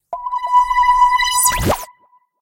HITS & DRONES 28
Fx, broadcasting, Sound